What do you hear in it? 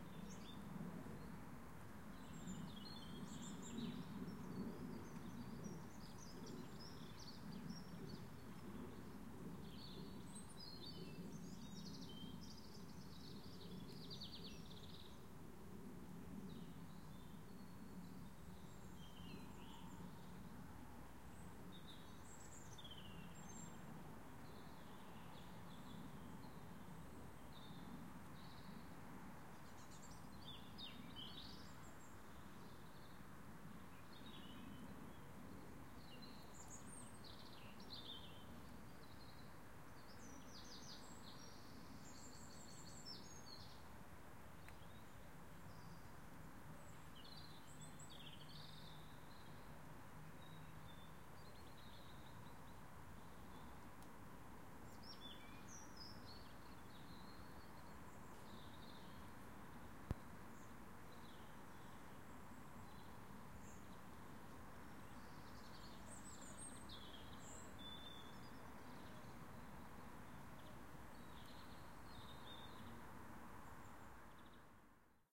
Residential Street Ambience Quiet Birds
Ambience, Birds, Quiet, Residential, Street